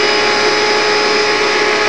vacuum running 2
The sound of a Royal "DirtDevil" Model 085360 vacuum cleaner running. Relatively high pitched motor sound with fainter lower pitched a buzzing sound.
Recorded directly into an AC'97 sound card with a generic microphone.
noise, household, vacuum